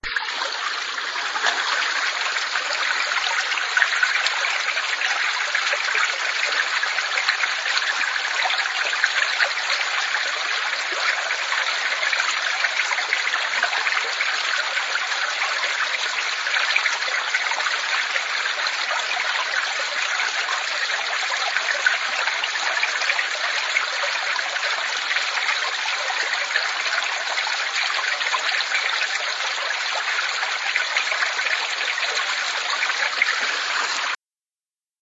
A recording of water going into a pipe with some echoing into the pipe. Recorded at Beamer Conservation Area.
stream, echo, pipe, condensormic, splashing, iriver799, creek, field-recording, water, gurgle